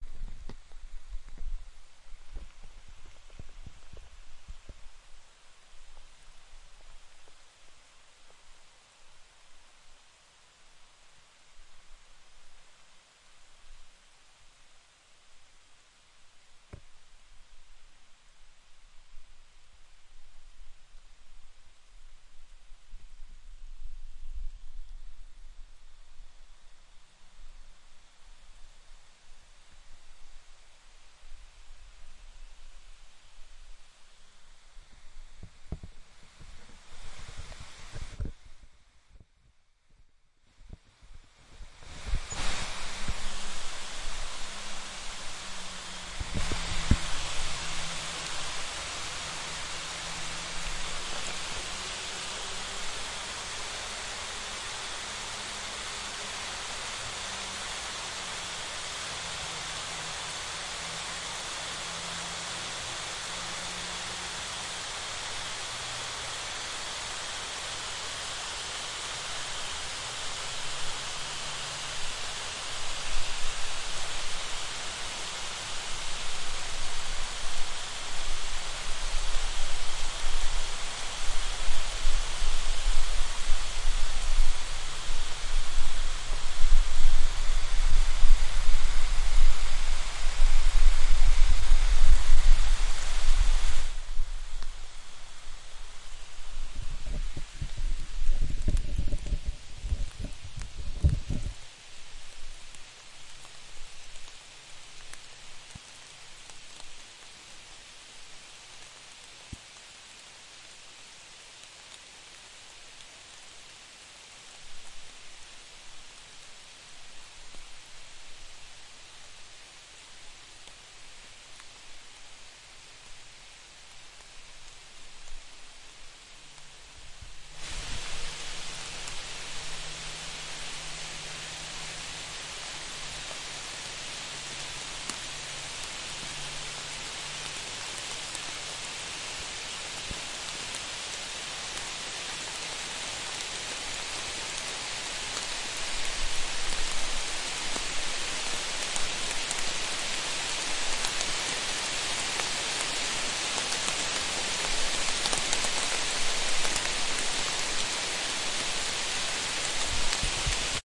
RainStorm - Various
I got caught in a freak rainstorm whilst hiking a bit ago, but luckily had packed my H6 in my bag and was able to get some good little rain snippets! There's some super close sounding droplets hitting leaves, some wider pouring rain, and a bit of hand-held noise unfortunately, but I figured there's a little something for everyone here!
Recorded on Zoom H6n with XY 120 degree pair
rain, nature, forest, field-recording, H6